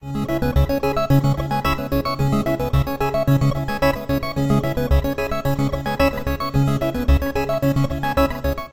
120bpm arpeggiated loop. Made on a Waldorf Q rack.